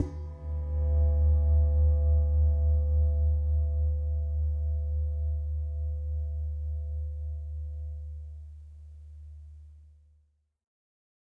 Couv MŽtal Lo
household, percussion